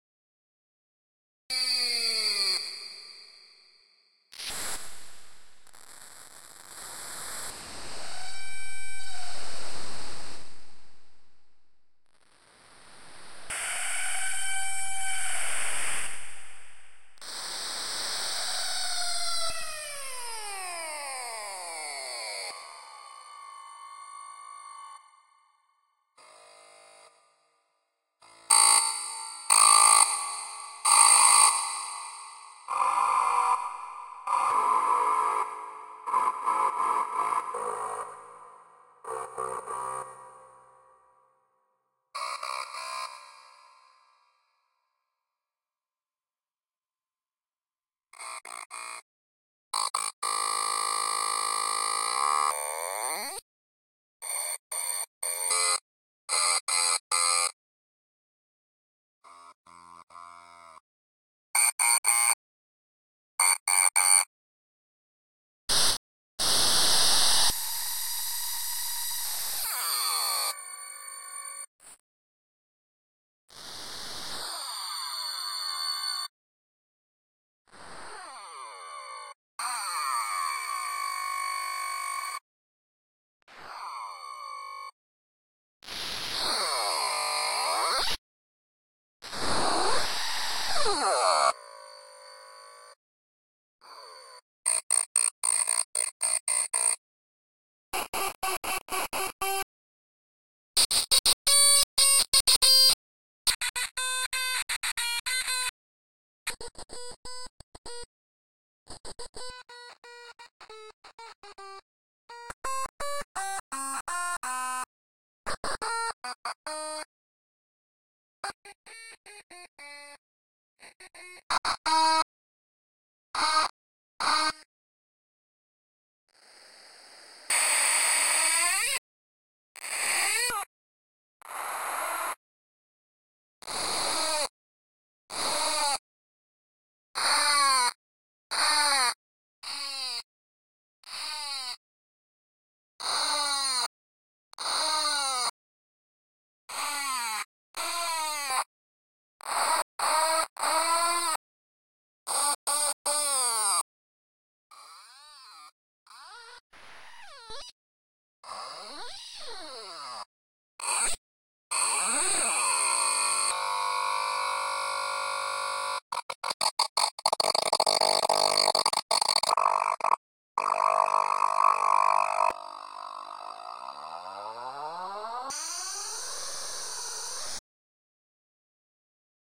A typewriter sound vocoded by sounds of a construction area, played on a wacom tablet